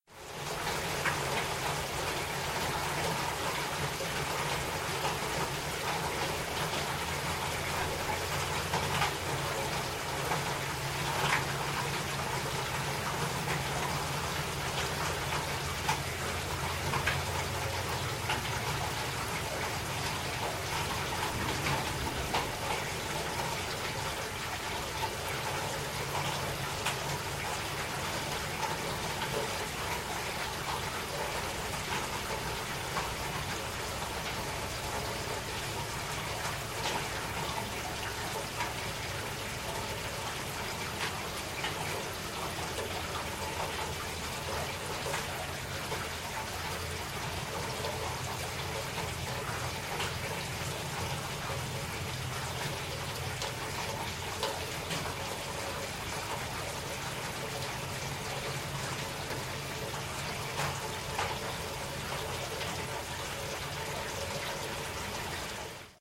Ambience, Rain, Moderate, B
Raw audio of a moderate rain storm. This was recorded in Callahan, Florida.
An example of how you might credit is by putting this in the description/credits: